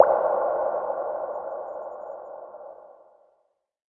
waterdrop effect with reverb
underwater, ocean, reverb, ambient, water, fx, effect, drop